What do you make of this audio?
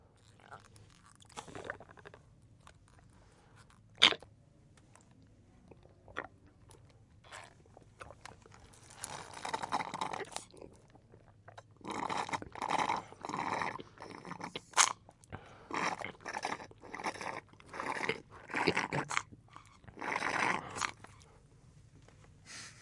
drinking chocolate milk with straw